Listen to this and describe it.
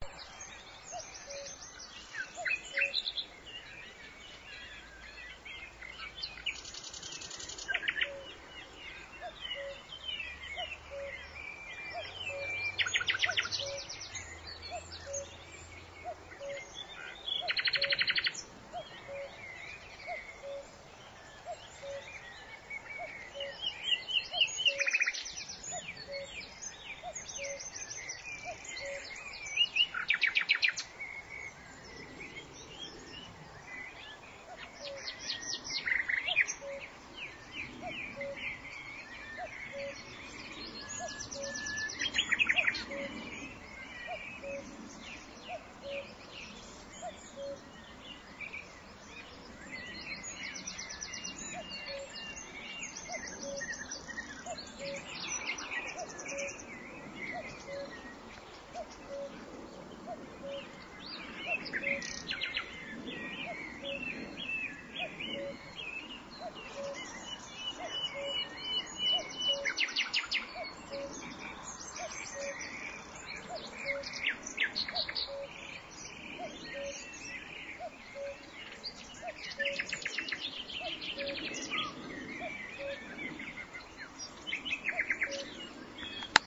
Cuckoo & The Nightingale Duet
Cuckoo & Nightingale singing in the early hours of twilight...
You can hear other bird sounds in the background, other nightingales as well as thrushes & crows.
May 2010.
Cuckoo, Duet, England, Meadow, Nature, Nightingale, The, Woodland, bird, bird-song, birds, birdsong, chorus, evening, field-recording, forest, sounds, spring